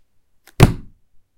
Book Drop - 2
Dropping a book